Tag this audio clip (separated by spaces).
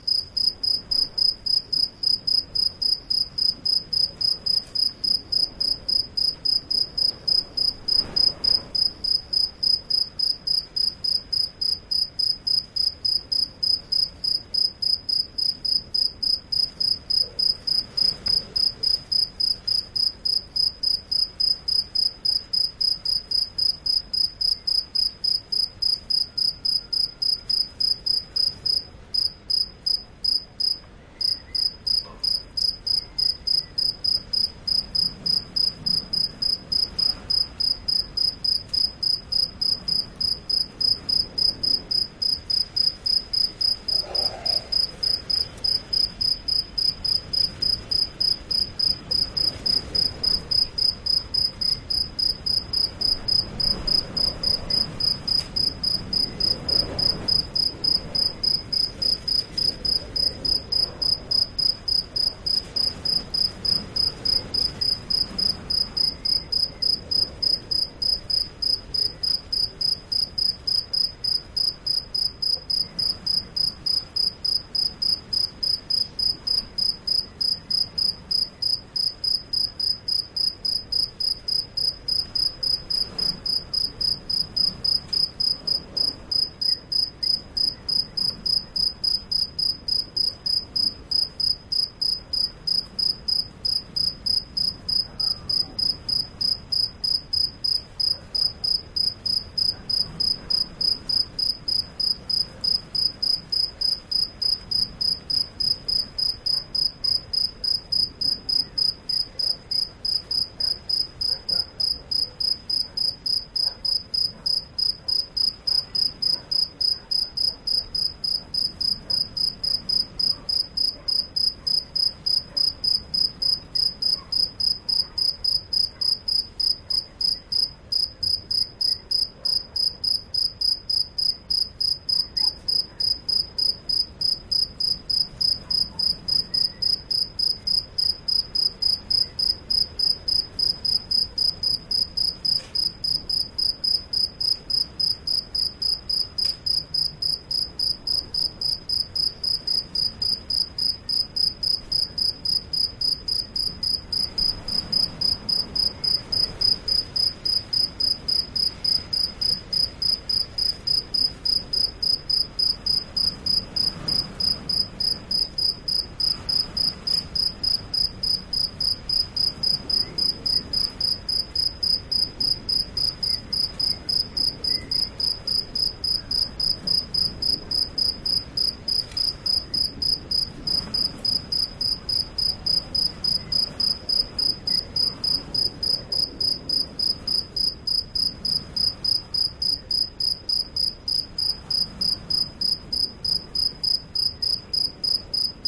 ambiance bolonia cricket field-recording insect nature night south-spain summer tarifa wind